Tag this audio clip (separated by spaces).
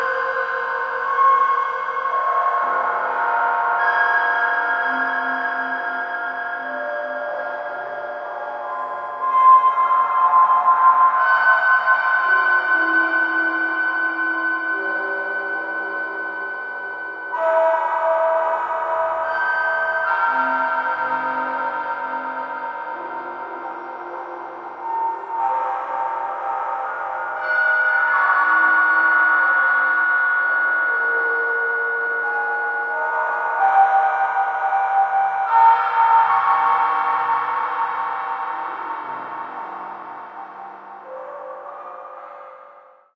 soundscape sinister cinematic scoring atmosphere ambient contemporary abstract space soundesign theatre dark scape drone pad synth ambience suspance tense synthesizer outtake sweep experiment